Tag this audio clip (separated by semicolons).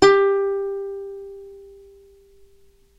sample; ukulele